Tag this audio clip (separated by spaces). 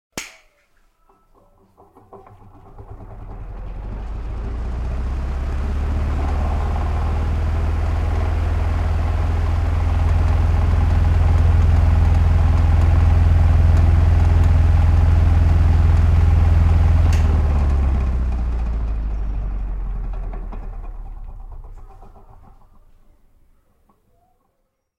metal switch switch-off switch-on